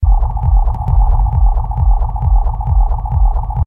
sound-design created from detritus and processed with a lot of delay in Adobe Audition and Native Instruments Reaktor; I've been told that this sounds like a field-recording of crickets at night, but it isn't a field-recording